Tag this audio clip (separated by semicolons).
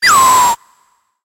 fauna; sfx; creature; vocalization; animal; sci-fi; sound-effect; synthetic; alien